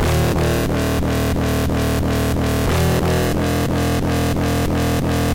180 Krunchy dub Synths 09
bertilled massive synths
dub, bertill, free, massive, 180, synth